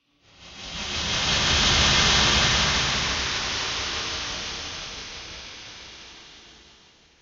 Alien Welding 2
Bzzt! Bzzt! Construction worker Zorbex welds away at a massive steel alloy beam. Yeah, that's pretty much it. If this describes your sound needs you've found the perfect sound! Made by paulstreching my voice.
alien, arc, construction, factory, industrial, metal, science-fiction, sci-fi, spark, weld, welder, welding, zap